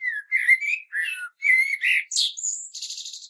Turdus merula 11
Morning song of a common blackbird, one bird, one recording, with a H4, denoising with Audacity.
blackbird, field-recording, nature